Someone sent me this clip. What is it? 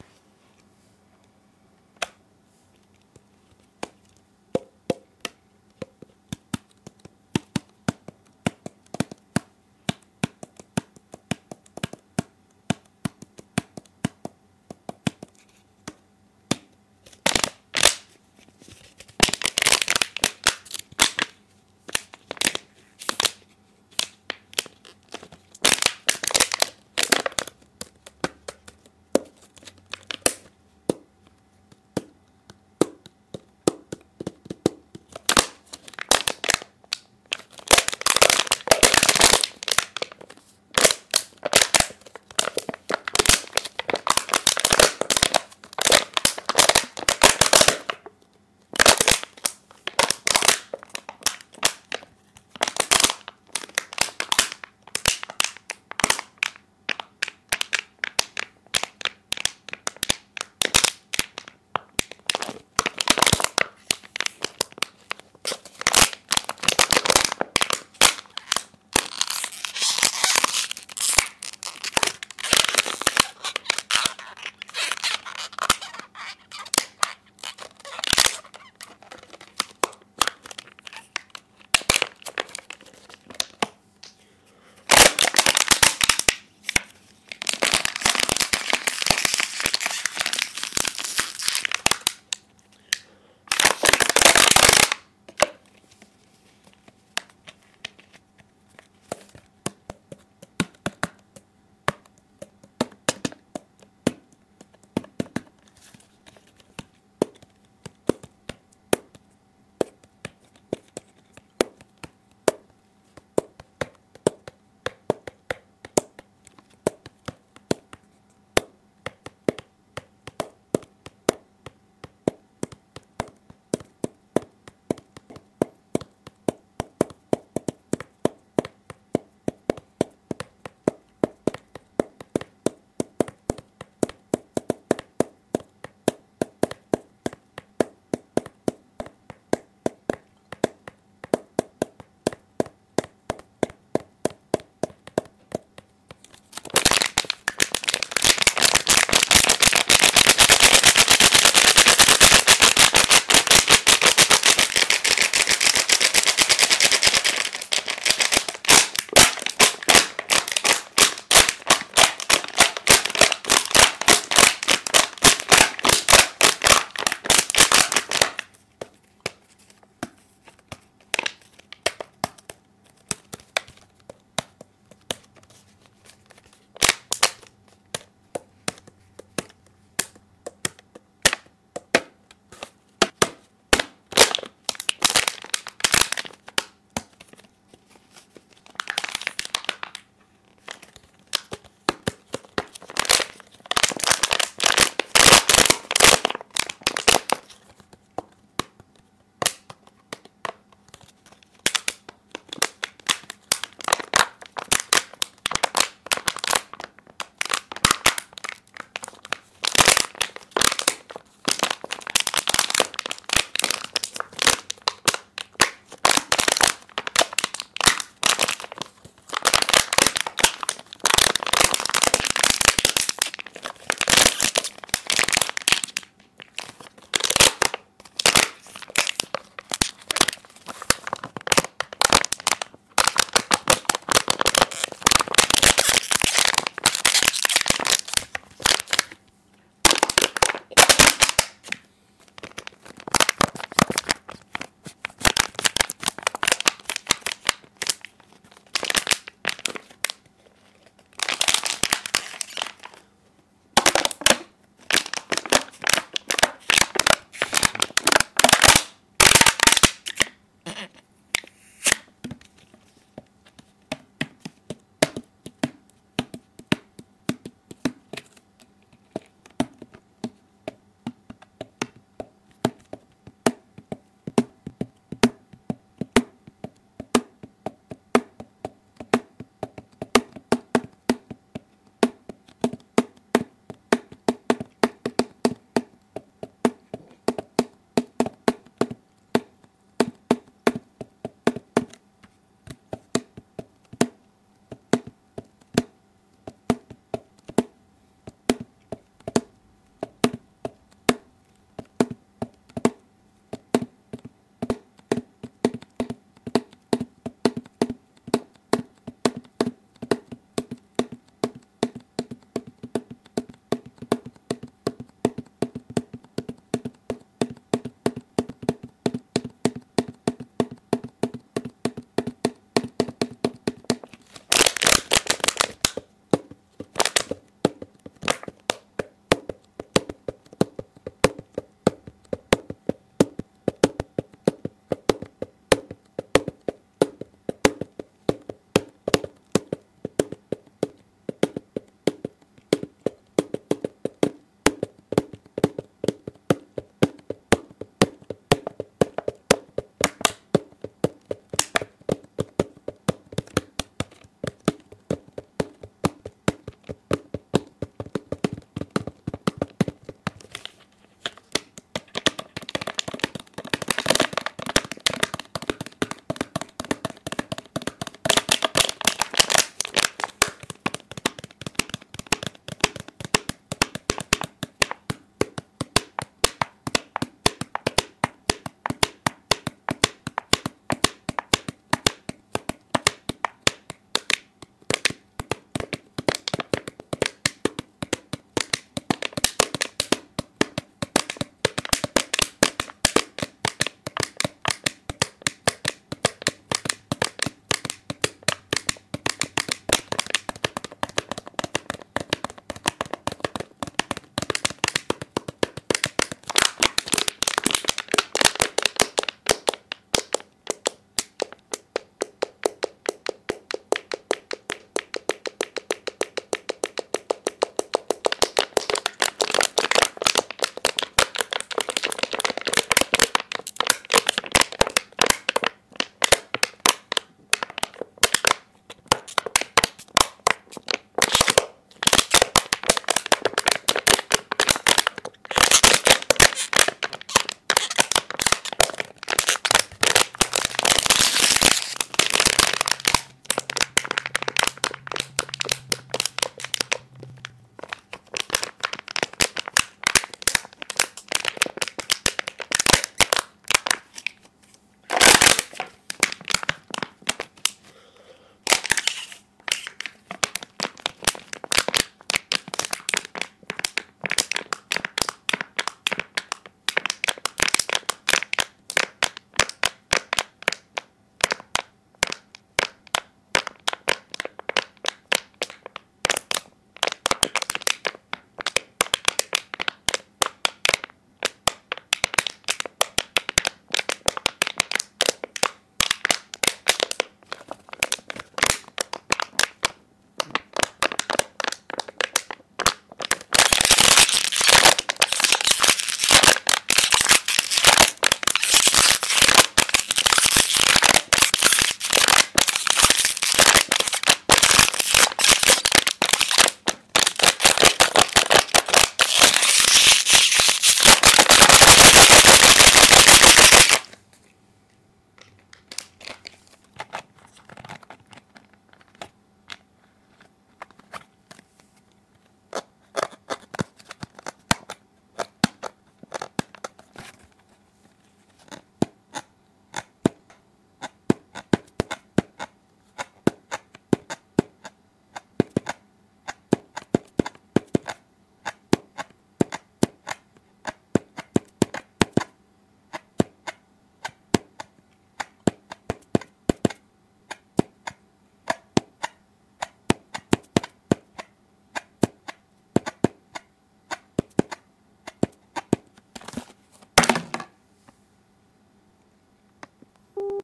macbook/recordpad
arroowhead ecoshape bottle